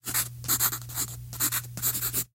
writing-short-7
Writing on paper with a sharp pencil, cut up into "one-shots".
drawing, sfx, writing, design, write, sound, foley, paper